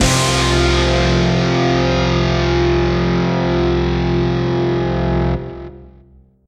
guitar and drums (2) 90bpm Fsus
distorted-guitar, distortion, Fsus, Guitar, impact, intro